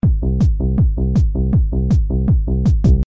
Fusion drum loop 1
Nice drumloop done by me :)
trance, drum, loop, beat, techno, drumloop